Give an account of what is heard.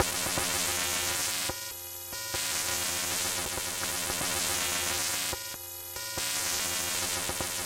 Another computer noise.